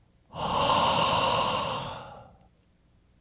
A single breath out
Recorded with AKG condenser microphone M-Audio Delta AP